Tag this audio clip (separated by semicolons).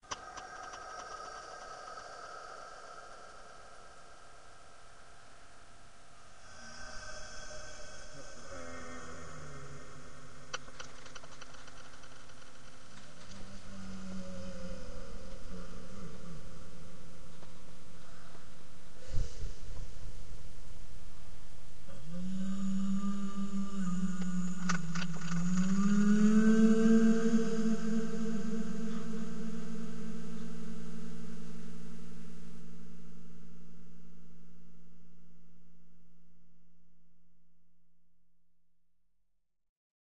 sfx; eerie; scary; voice; sticks; voices; creepy; spooky; death; sound; effects